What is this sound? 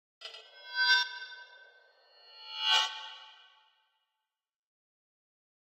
Sound I made with knives. Used Abletons Simpler to load in a few knife scrapes then hit the reverse button them and played a note.
Mic used - AKG C1000